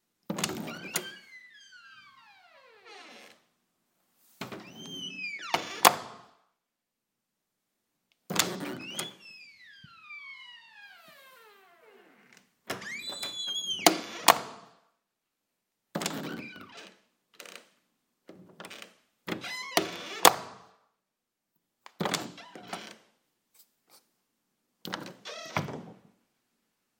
Opening and closing my door with engaging the doorknob latch